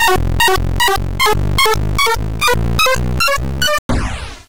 Bombtimer-Explode 10beats
This sound was created in Audition by using three sample tracks with altered pitches for the sound of the timer countdown. I used an altered 8 bit sample for the explosion, bringing out the low range and lowering the high range with a 30 band compressor.
8, Bit, Sample, Retro